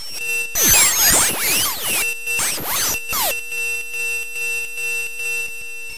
Constantly switching alarm
A kind of alarm sound that glitches every couple of seconds, as if a system in a building is being hacked, in a sci-fi setting.
Created using Chiptone
abstract, beep, beeping, beeps, computer, digital, effect, electric, electronic, freaky, future, fx, game, glitch, glitches, glitching, hack, hacking, lo-fi, machine, noise, sci-fi, sfx, sound, soundeffect, squeak, squeaking, squeaks, strange, weird